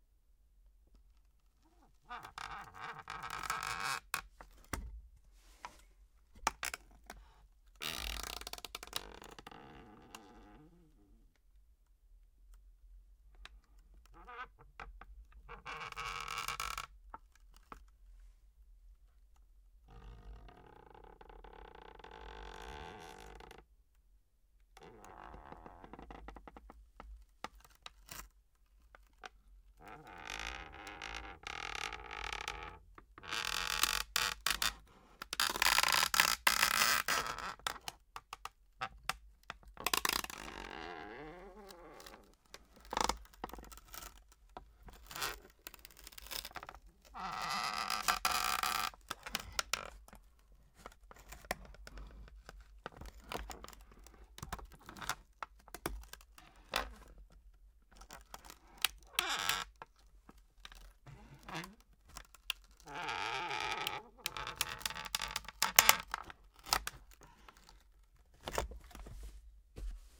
fly 5 boat crack 02
foley sound made with old wooden pieces nailed together, good for wood furniture or a boat